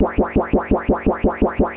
A sound that resembles the original Pac Man video game. Created using only the Subtractor synth in Reason.
pac-man, video-game, synth, subtractor, reason